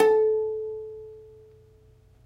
Notes from ukulele recorded in the shower close-miked with Sony-PCMD50. See my other sample packs for the room-mic version. The intention is to mix and match the two as you see fit.
These files are left raw and real. Watch out for a resonance around 300-330hz.
note, string, uke, ukulele